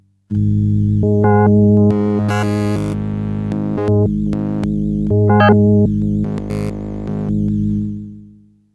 Yamaha PSS-370 - Sounds Row 3 - 15
Recordings of a Yamaha PSS-370 keyboard with built-in FM-synthesizer
Keyboard, Yamaha, PSS-370, FM-synthesizer